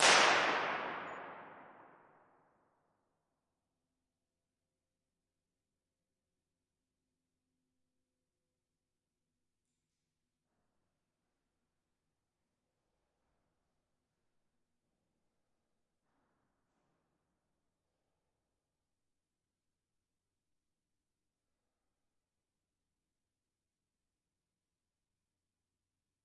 Spinnerij TDG tower mid cm2-02
Tower IR halfway. Recorded with LineAudio CM2s. ORTF Setup.
convolution, reverb, impulse-response